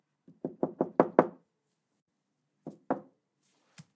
Knock on Door
door, Knocking, wooden, wood, knuckles, Knock, soft, finger